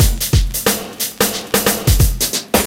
monster beat high hat
funky beat raw dirty distorted drum
beat, dirty, drum, funky